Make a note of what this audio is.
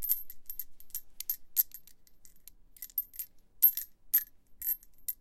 Rolling some shell casings around in my hand.
bullet, home-recording, casing, bullets, shell, metal, gun, shells, shell-casings, metal-noise